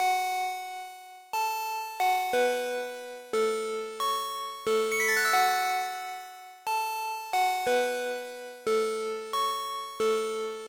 bells made with sine wave with pitch bend